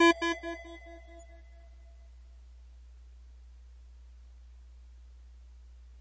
Beep sound created with Sylenth1